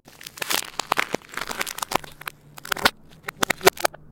Ice 8 - reverse
Derived From a Wildtrack whilst recording some ambiences
footstep, effect, crack, foot, snow, BREAK, ice, cold, winter, freeze, field-recording, walk, frozen, frost, sound, step